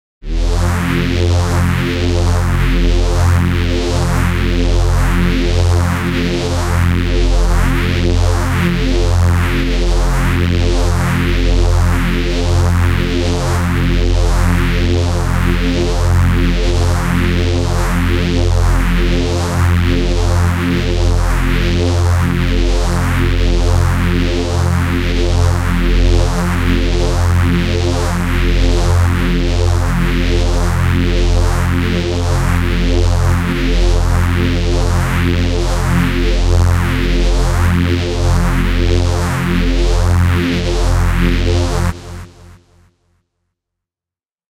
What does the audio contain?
LOW DRONE 006
One of a series I recorded for use in videao soundtacks.